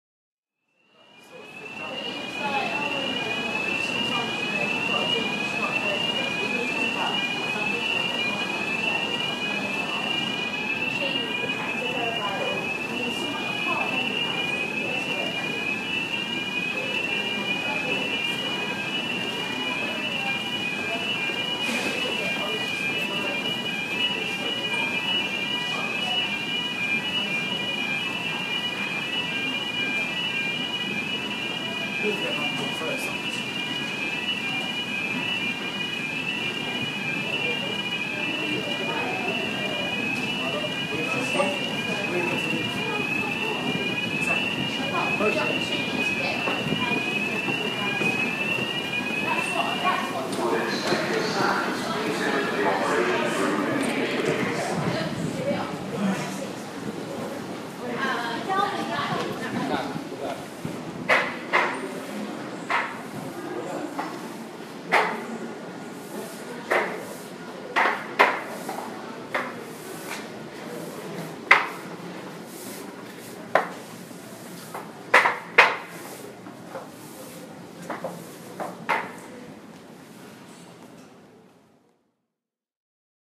London Underground Tube Station Alarm
london, station, metro, square, field-recording, leicester, alarm, tube, underground